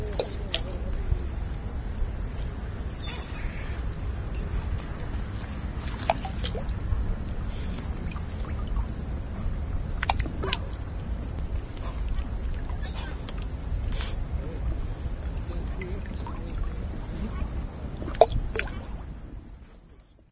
Bolotas na Água Parque da Cidade
This is a sound of acorns falling into a Parque da Cidade's lake. This sound was recorded with our handmade binaural microphones.
acorn, ulp-cam